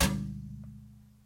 Big bass brush hit on metal
brush, hit, metal, object, plastic, wire